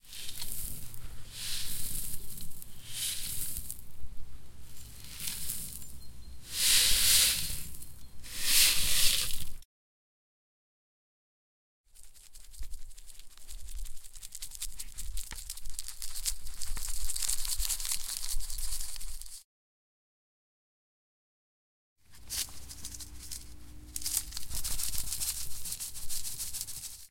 13 sand in a bucket
CZ, Czech, Panska, Pansk